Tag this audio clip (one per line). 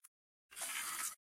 eye
future
mechanismstereo
robot
zooming